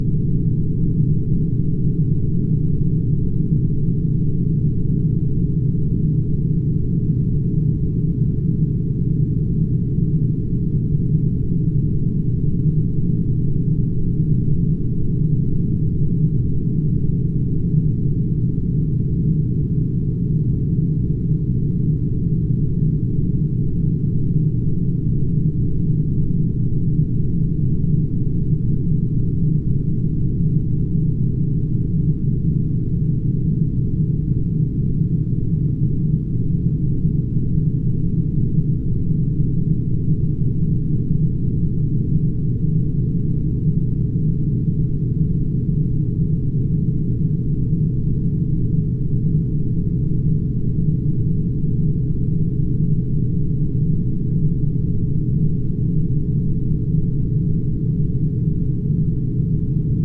3x256 500k reso 500hz y freq float 1pointfloat
Sound created from using the rings of Saturn as a spectral source to a series of filters.
The ring spectrogram was divided into three color planes, and the color intensity values were transformed into resonant filter cutoff frequencies. In essence one filter unit (per color plane) has 256 sounds playing simultaneously. The individual filters are placed along the x-axis so, that the stereo image consists of 256 steps from left to right.
In this sound of the series the spectrum was compressed to a range of 20 -500 hz. A small variation in certain divider factor per color plane is introduced for a slight chorus like effect.
chorus-effect, experimental, fft, filter, noise, planet, resonance, resynthesis, saturn, space